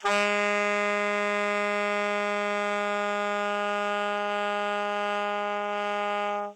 Alto Sax G#3
The G#3 note played on an alto sax
instrument, sax